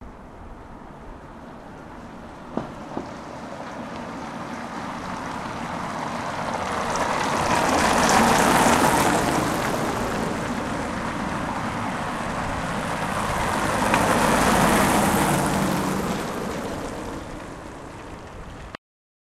Two cars passing by on a cobblestone road in the old town of Porvoo, Finland.